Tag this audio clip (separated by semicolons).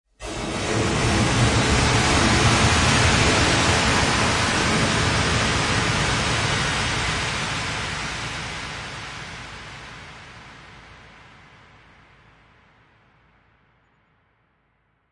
sfx; sound; fx; effect